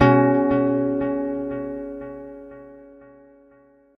DW B6.9 ECHO
DuB HiM Jungle onedrop rasta Rasta reggae Reggae roots Roots
onedrop, Jungle, HiM, rasta, roots, DuB, reggae